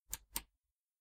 Button Click 09
The click of a small button being pressed and released.
The button belongs to a tape cassette player.